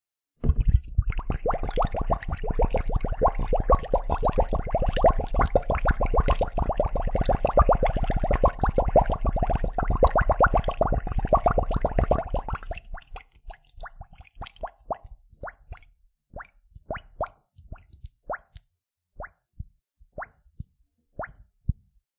water bubbles in bottle
Submerging a plastic water bottle into some water. Lots of bubbling.